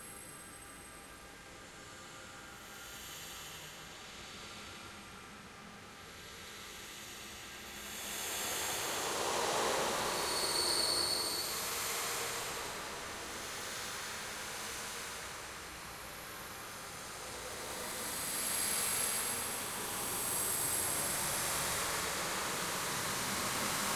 Ghost Ambience sound
ambient, creepy, eerie, Ghost, Ghost-sound, haunted, horror, scary, spooky, terrifying, terror